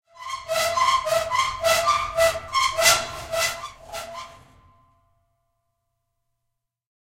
Squeaking Metal Closet Door in Basement 2
Opening and closing a metallic closet to make a painfully squeaking sound. Recorded in stereo with Zoom H4 and Rode NT4.
metal, room, door, terror, squeaky, open, metallic, gate, squeaking, aching, squeeking, horror, squeaks, closing, painful, iron, portal, basement, opening, close